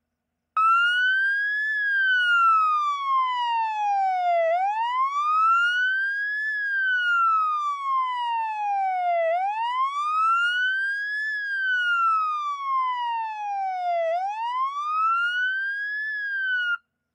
MISC Police Siren Wailer Static 001
Stationary perspective of an American police siren wailing.
Recorded with: Fostex FR2Le, AT BP4025